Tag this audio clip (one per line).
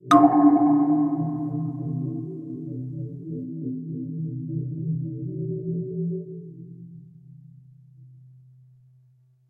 metal
percussion
transformation